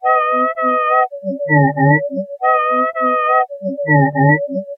circus freak
This started out as a squeak from a cupboard door and with help from Iris it because this broken down calliope loop from a killer circus.